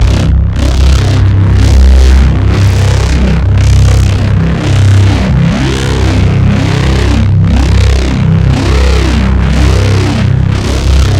ABRSV RCS 016
Driven reece bass, recorded in C, cycled (with loop points)